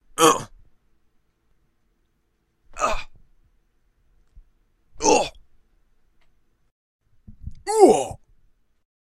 TELV 152 Worker Grunt
man, worker, pain, grumble, husky, grunt